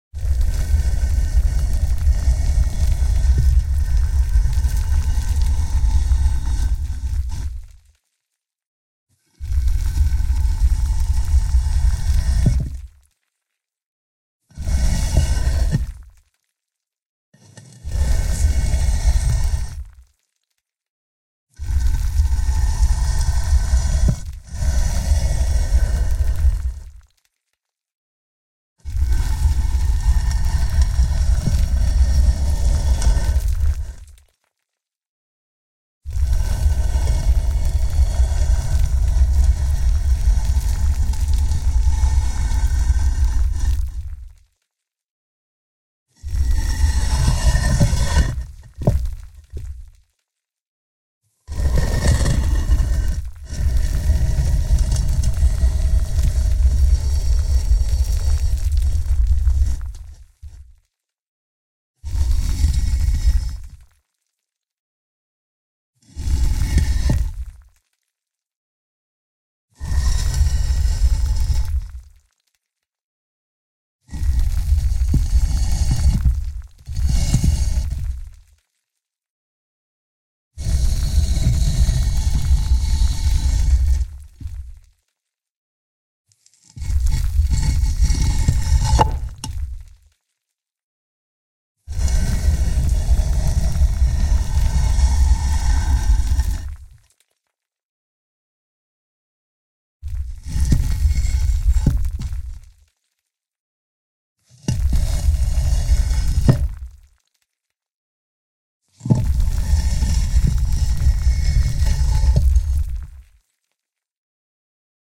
Moving the grave stone.With stone sand(5lrs,mltprcssng)
The sound of a gravestone slab being dragged. Various movement variations. Subsound with chipped small stones and sand. Enjoy it. If it does not bother you, share links to your work where this sound was used.